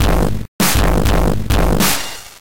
100 Studio C Drums 08
crushed, synth